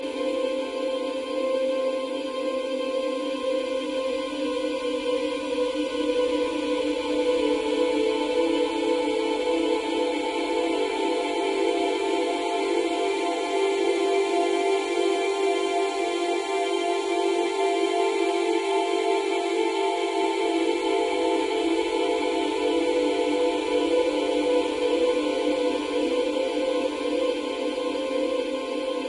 An ethereal sound made by processing a acoustic and synthetic sounds. This sound contains loop-point markers and can be infinitely looped (bi-directional cross-fade across the end and start of the sample, the loop transition is seamless). It will cut off abruptly if not looped.